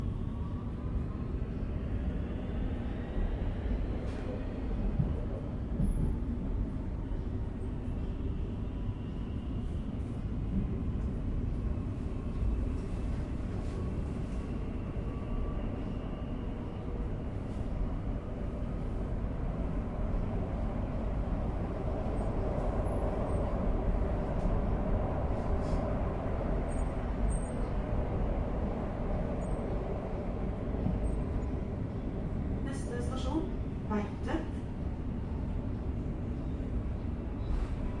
metro inside
inside Metro’s rail car
T-bana, train